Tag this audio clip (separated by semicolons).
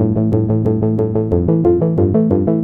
loop; 91; bpm; synth